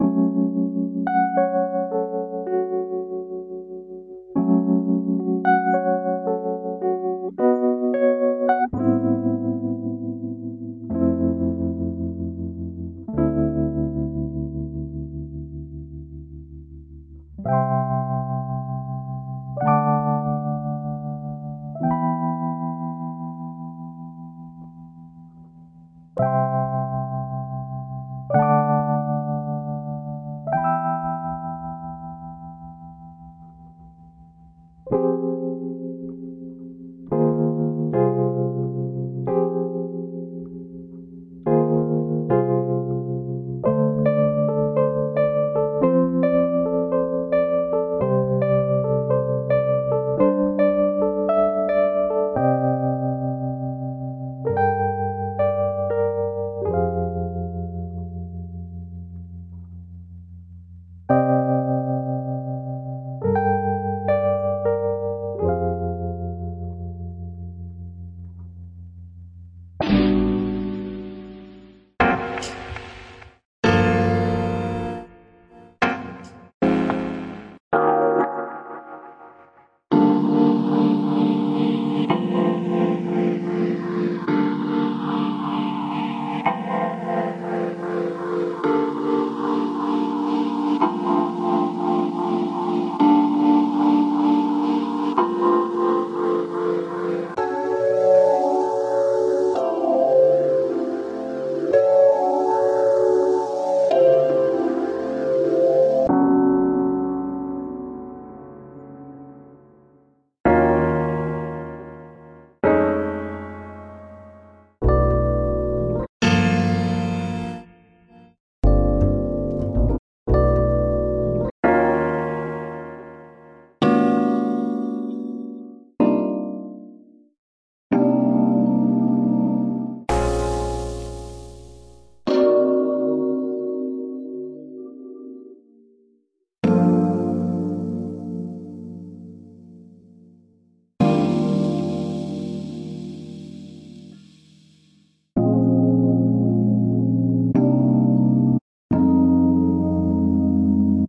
Deep House Chords - Morphagene Reely by Peng Punker
piano/ electric piano chords for deep house, dub techno etc.
enjoy!
peng-punker, morphagene, mgreel, make-noise